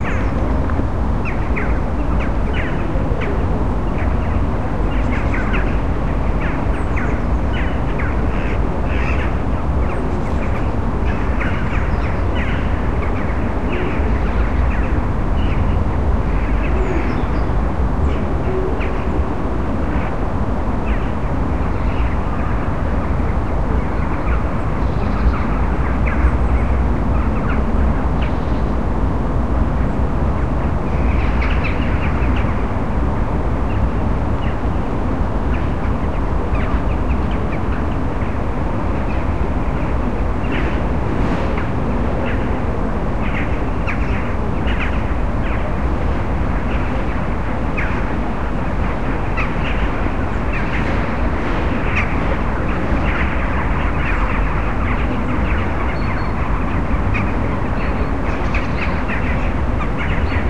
a murder of jackdaws
Despite its menacing (for non-native English speakers, at least) title, it's just a minute-long clip with jackdaws clattering. There was a huge flock of them circling above the slums I reside in, so I took the chance. A usual drone from nearby streets and a murmur of a group of janitors standing in the distance are heard as well. Recorded in the Moscow, at around 9am.
jackdaw, city, nature, drone, murmur, ambience, birds, field-recording